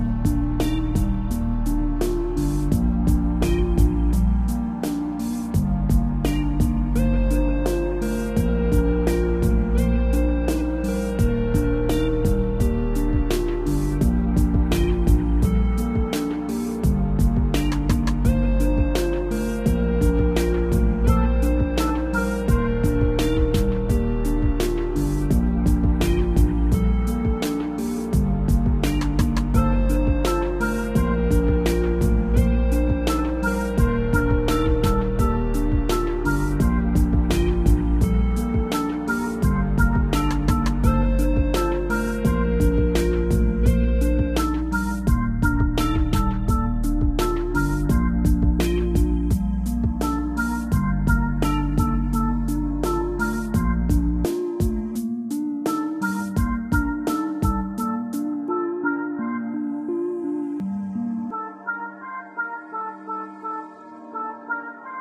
ghost house
arcade, calm, game, games, relaxing, video